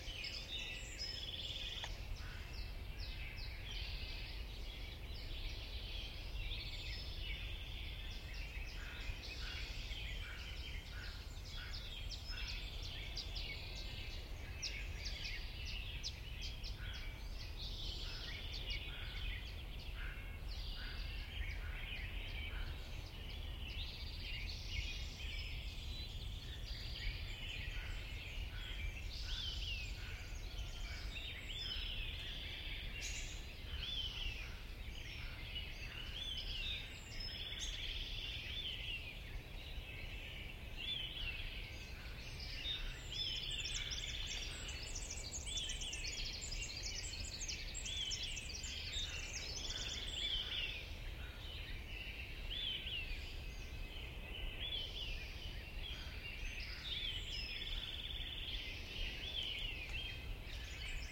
This is a field recording in late May, 2009 off the porch of my cabin located on Lummi Island, Washington State, USA. The woods are filled with a cacophony of migrating and local bird sounds. This is a raw field recording it has not been mixed or overdubbed.
birds, field-recording, washington-state-usa, woods